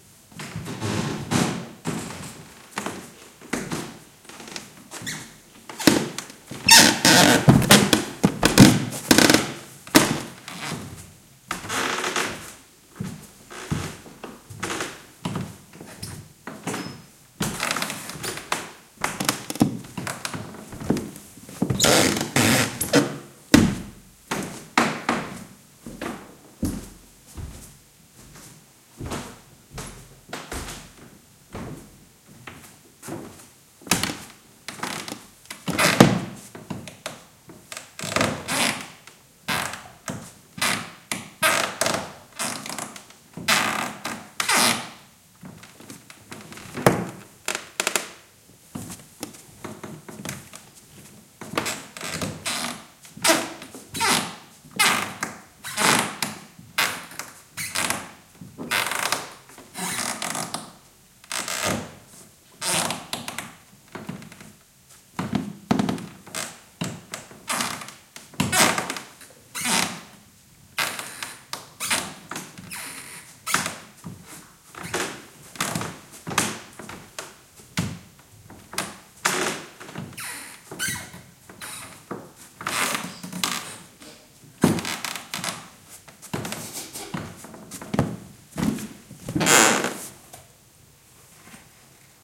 20190101 wooden.floor.creaking
Close take of someone walking on a creaky wooden floor. EM172 Matched Stereo Pair (Clippy XLR, by FEL Communications Ltd) into Sound Devices Mixpre-3 with autolimiters off.
creaking, wooden, walk, stairs, floor, footsteps, field-recording